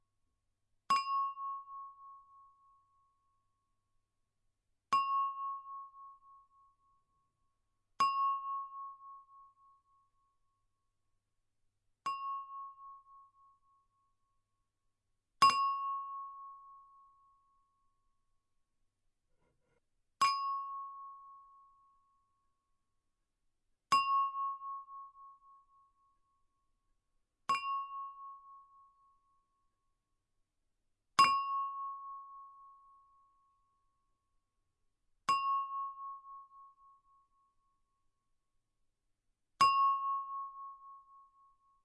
A mug tapping a bowl
A china mug tapping a china bowl. Recorded with a Zoom H5 on a tripod. No alterations have been made to the sound.
bowl, kitchen, mug